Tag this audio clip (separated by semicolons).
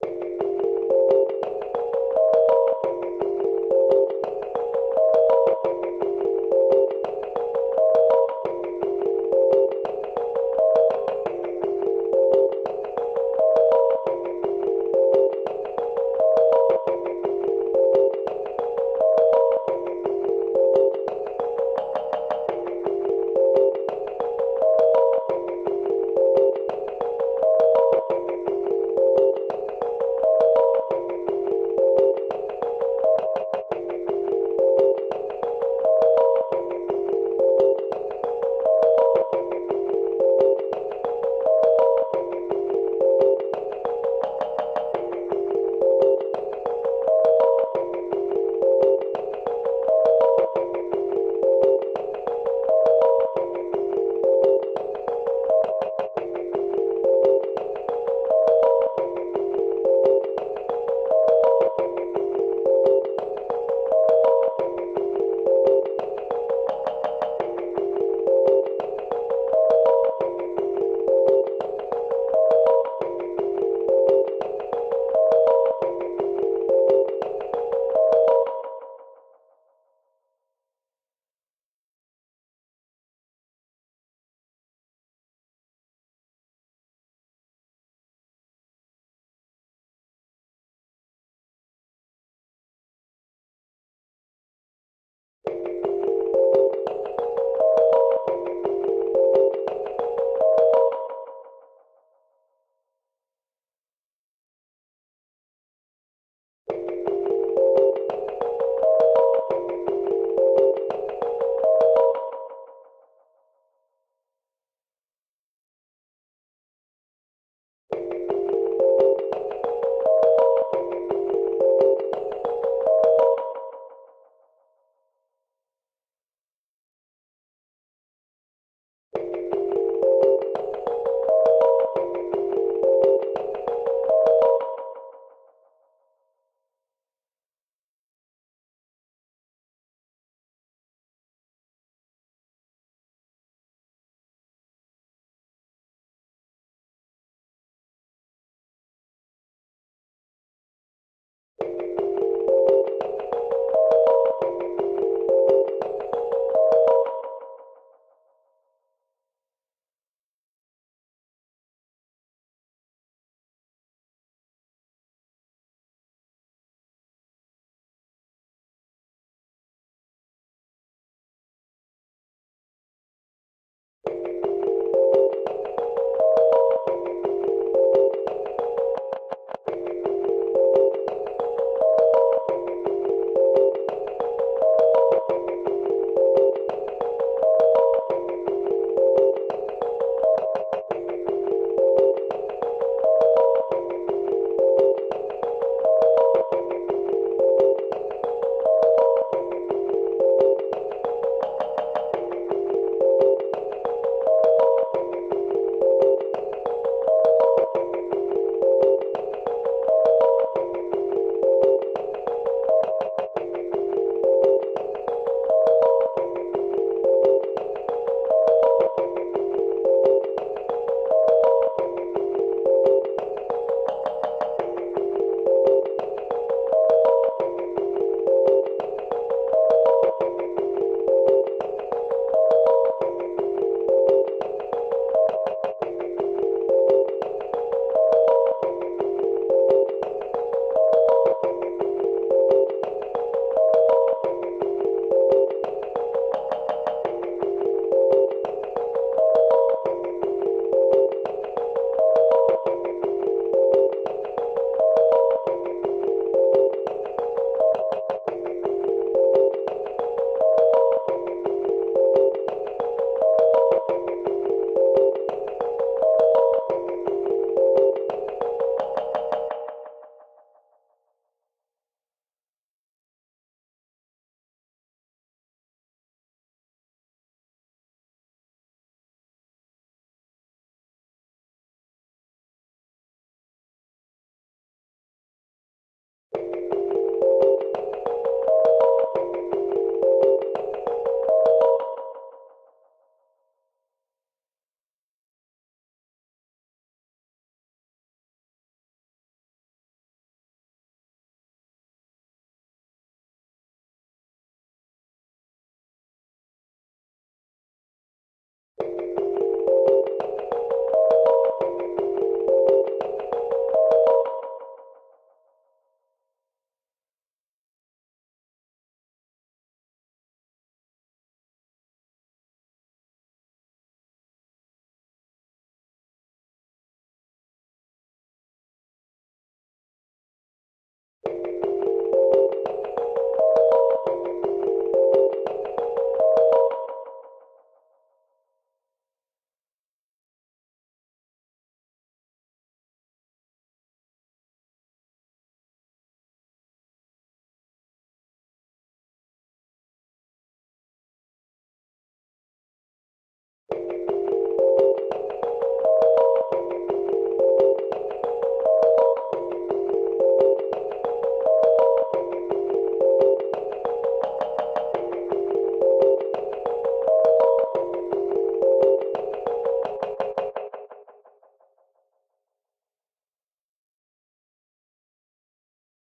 Melody; Music; chimes; loop; japanese; ambience